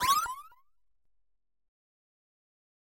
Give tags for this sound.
8bit; intercom; videogame

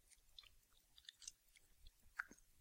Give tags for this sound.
cream; paste; toothpaste

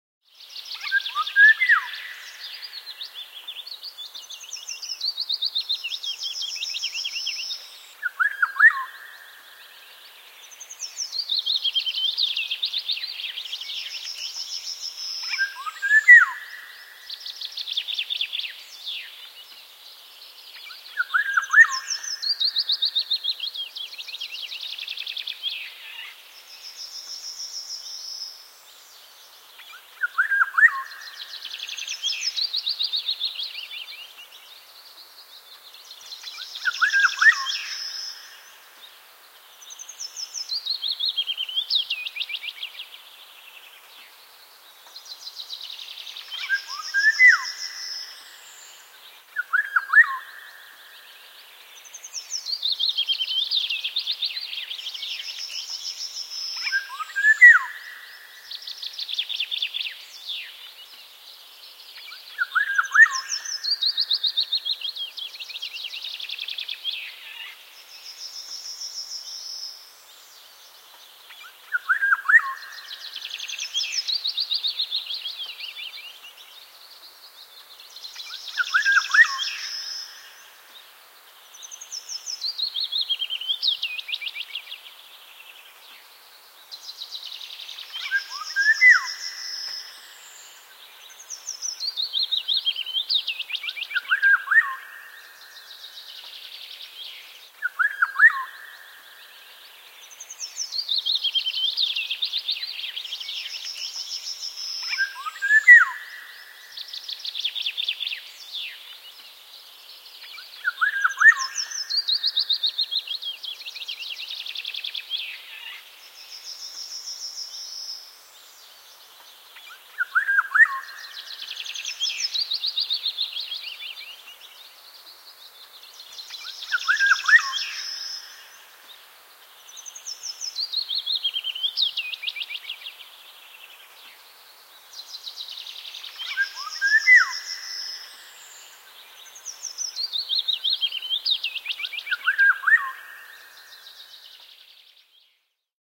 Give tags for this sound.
Suomi; Luonto; Summer; Birdsong; Yleisradio; Yle; Spring; Lintu; Nature; Field-Recording; Tehosteet; Soundfx; Forest; Bird; Golden-oriole; Finnish-Broadcasting-Company; Linnunlauu; Linnut; Finland; Birds

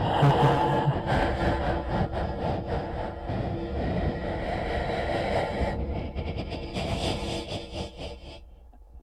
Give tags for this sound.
kaoss; musik; noisy; processed; vocals; weird

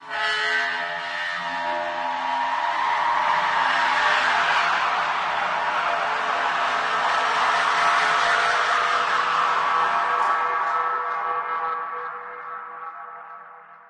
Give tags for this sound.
ambience; ambient; athmosphere; cinematic; dark; drone; dungeon; pad; scary; soundscape

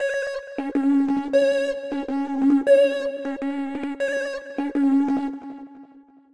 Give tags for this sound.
atmosphere; bass; beat; club; dance; drum; effect; electro; electronic; fx; house; layers; loop; music; rave; sound; synth; techno; trance

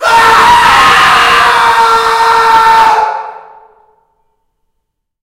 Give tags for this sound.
distorted; distortion; male; reverberant; scream

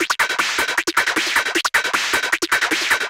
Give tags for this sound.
drums; hard; percussion; processed; rhythm

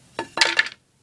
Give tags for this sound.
hit; impact; wooden

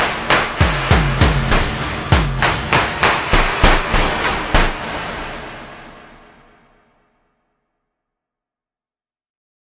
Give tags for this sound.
120BPM; ConstructionKit; dance; electro; electronic; loop; percussion; rhythmic

